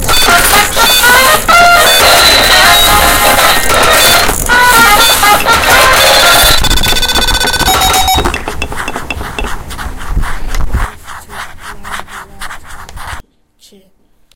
SoundScape GPSUK isaac kemal emily
cityrings, galliard, soundscape